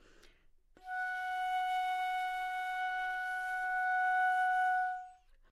overall quality of single note - flute - F#5
Part of the Good-sounds dataset of monophonic instrumental sounds.
instrument::flute
note::Fsharp
octave::5
midi note::66
good-sounds-id::118
dynamic_level::p
Fsharp5, flute, good-sounds, multisample, neumann-U87, single-note